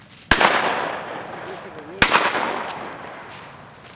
gunshots, close recorded near Arroyo Majaberraque (Puebla del Rio, Sevilla, Spain) / tiros de escopeta, cerca